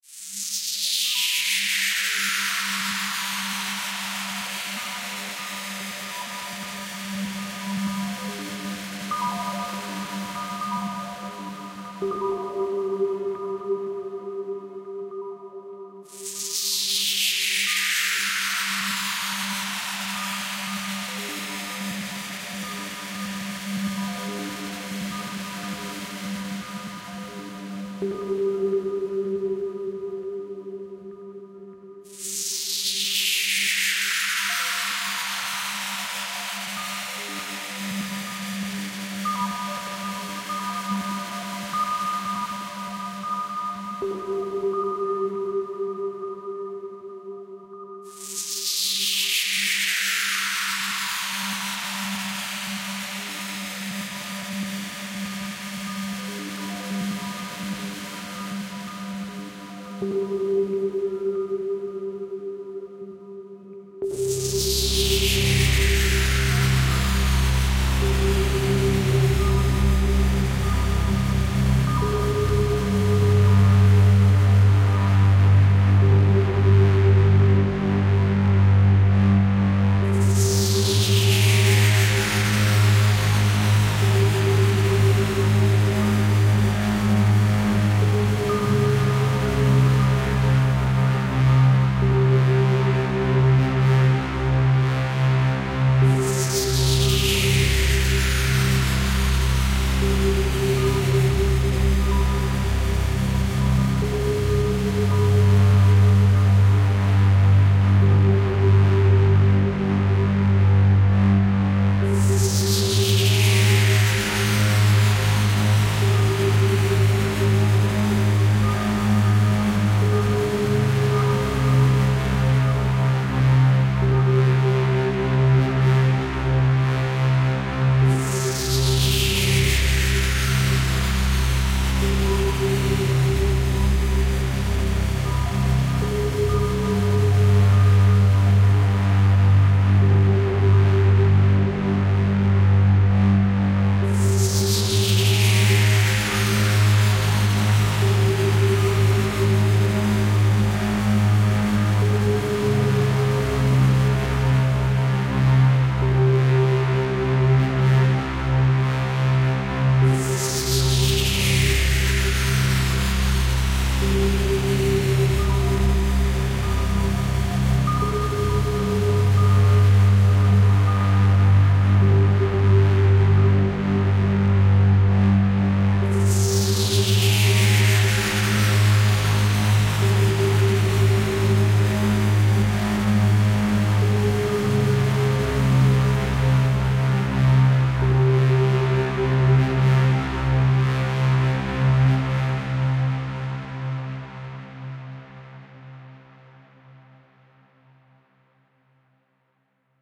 CWD LT population patch risen
ambience, ambient, atmosphere, dark, deep, drone, science-fiction, sci-fi, sfx